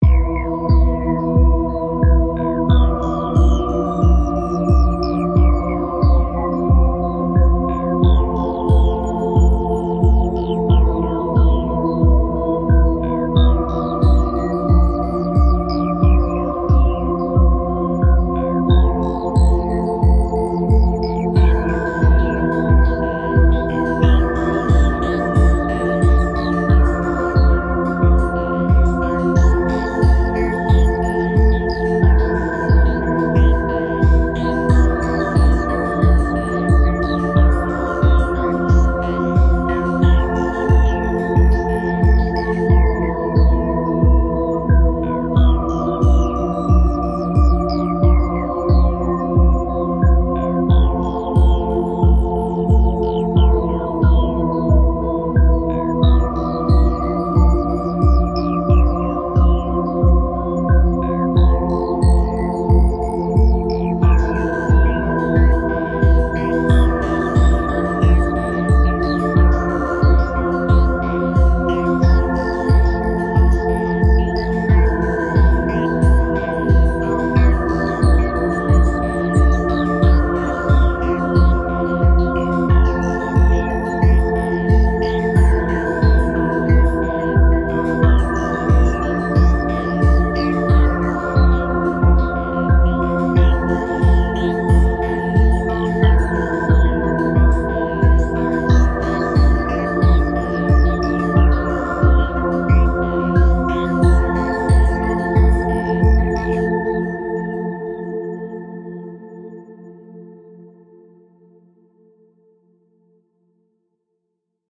CWD LT epilogue acid
ambient, atmosphere, cosmos, dark, deep, drone, epic, melancholic, pad, science-fiction, sci-fi, sfx, soundscape, space